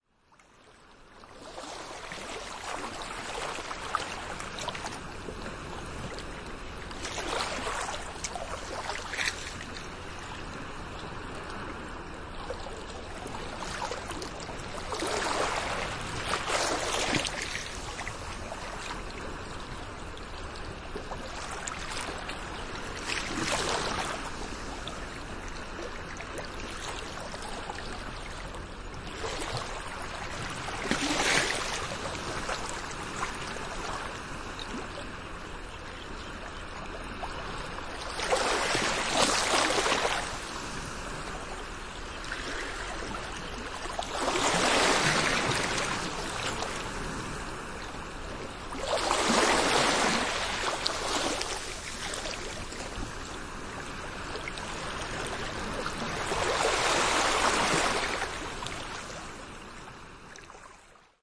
Small waves on a sand bar. St Agnes Isles of Scilly UK. Edirol R09hr EM172 mics.
ocean, coastal, wave, relaxing, shore, coast, sand, sea, field-recording, lapping, tide, summer, gentle, waves, surf, water, beach, splash, sandy, seaside